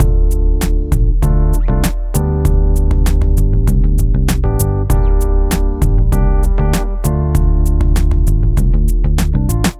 hip hop loop with electric piano drums and bass
Hip, Hopbeatsturn, To, up